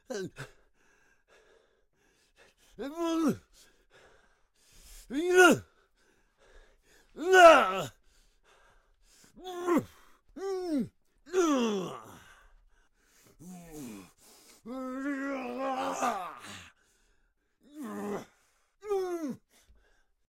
Fight Reaction Person 1
Male 65 y.o
punches, pain, fighting, aggression, hit, angry breath.
breathing, aggression, pain, fighting, attack, hit, angry, punches